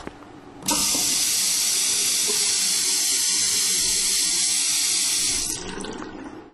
The sound of an automatic
push-sink being used,
those that are to be found commonly
in museums, public schools, etc...
faucet, water, bathroom